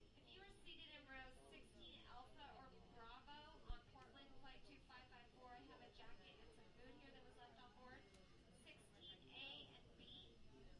An airport employee announcing there is a lost jacket to be claimed. The background noise is a small jet just outside.Recorded with Zoom H4 on-board mics.